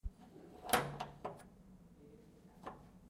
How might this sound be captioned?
This is the sound created by closing a door.
Cerrando una puerta
Recorded with Zoom H1